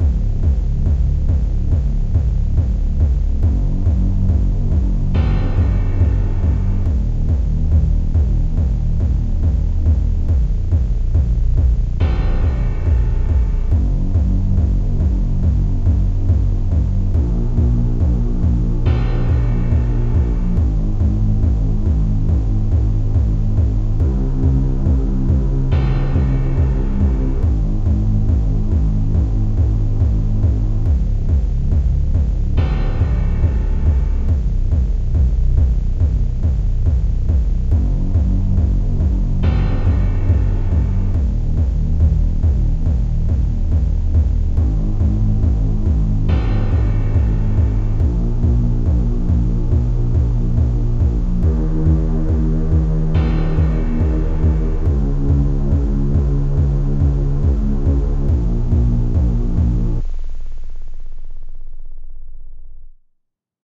Unnerving Horror Sample 2
atmosphere, creepy, dark, fear, horror, music, sinister, spooky, synth